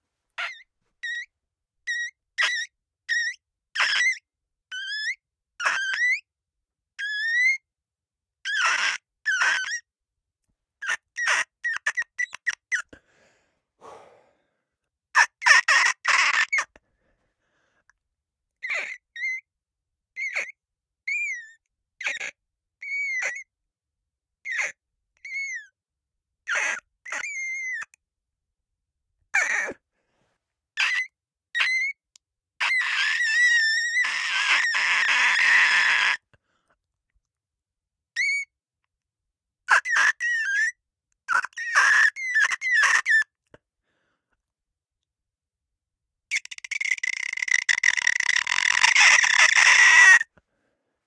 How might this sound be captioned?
CLEAN SQUEAK GLASS WIPE
A collection of strange noises made with my throat whilst trying to recreate the sound of cleaning squeaks. Totally organic. Recorded using a Neumann TLM103 & Pro Tools 10. The last one is very bizarre!
Clean, foley, High, sfx, spotfx, Squeak, Squeek, Throat, Voice, Wipe